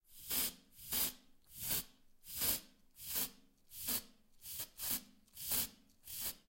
Deodorant spraying
Short sprays of deodorant recorded in bathroom.
Bathroom, Deodorant, Field-recording, Noise, Short, Spraying, Sprays